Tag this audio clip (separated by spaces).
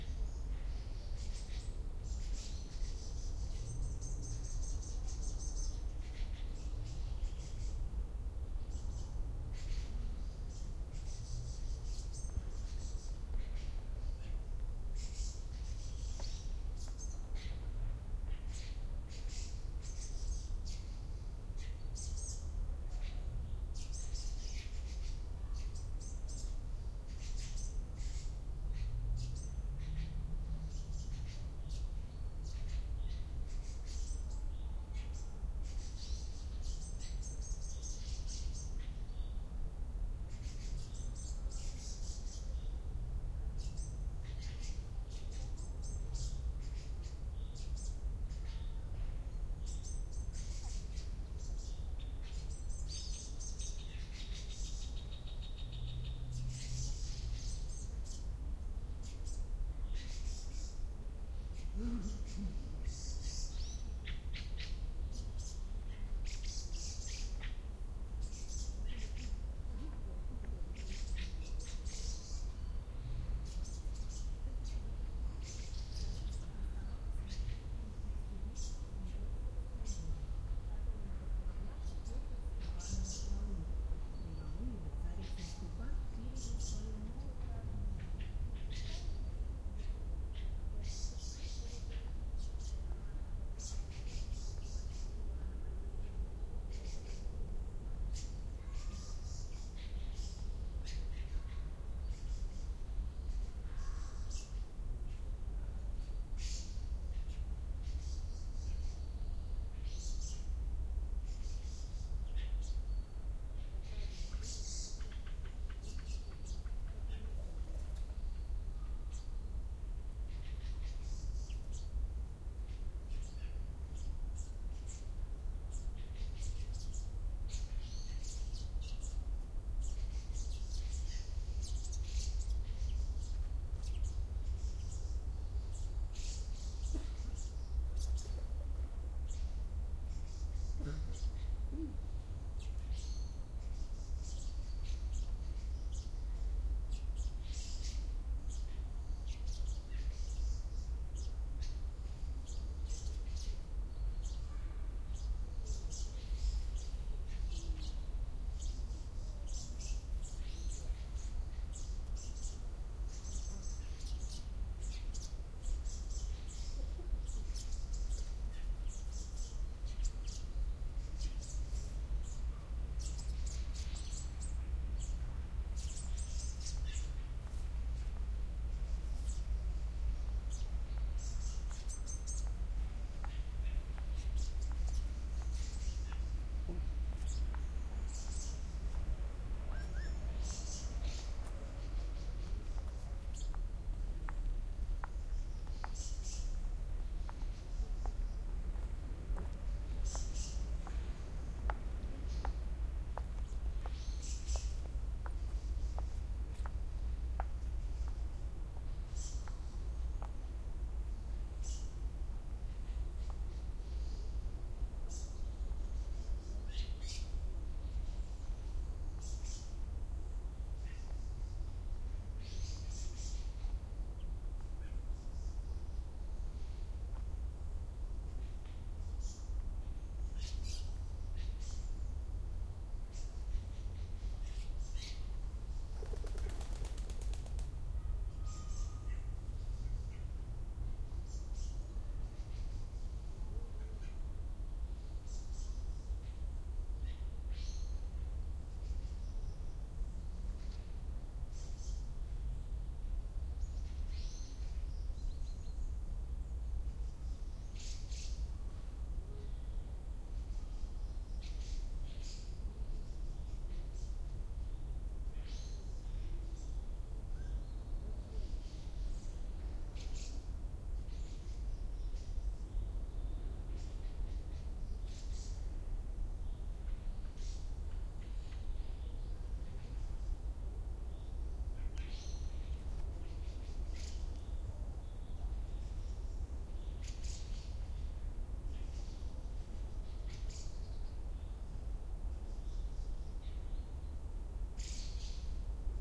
amb ambience birds distant-city field-recording forest Moscow nature park people Russia Russian sidewalk summer Tsaritsyno wing-flap